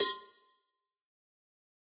Cowbell of God Tube Lower 024
home, trash, record, metalic